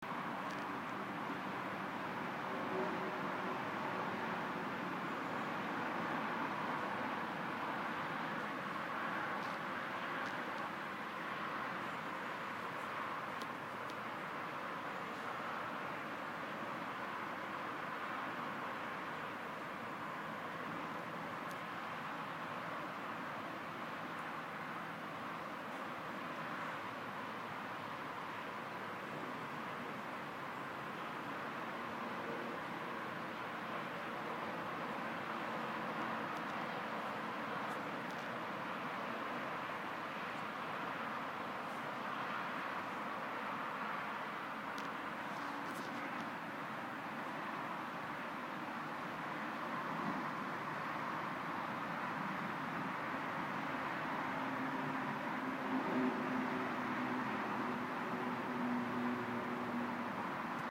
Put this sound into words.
Motorway ambient background scene. Recorded from a nearby forest.